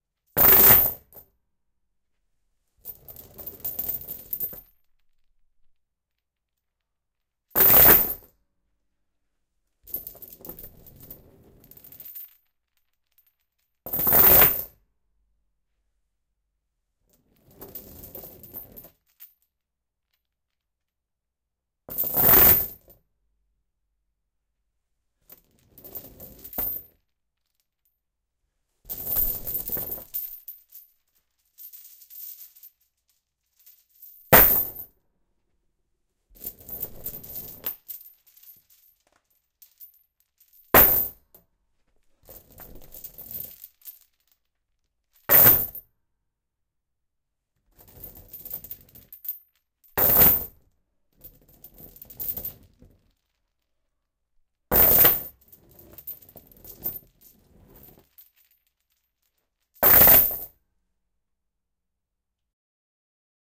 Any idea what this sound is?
Quick Chain Drops
A series of loud, fast chain drops on a linoleum floor. Most of the brightness is around 14k if you want to EQ it out. Recorded with two Kam i2's into a Zoom H4N.
chains, ow, quick, drop, bright, fast